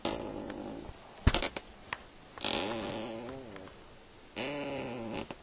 Leaning in Chair
All sorts of noise produced
by leaning around in a chair.